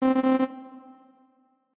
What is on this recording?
Error Sound
Sound effect you might hear when you get an error on a computer or in a video game. Made with BFXR.
windows, android, error, interface, computer, robotic